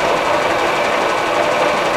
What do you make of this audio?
Pillar Drill Rotating Loop 2
Buzz, electric, engine, Factory, high, Industrial, low, machine, Machinery, Mechanical, medium, motor, Rev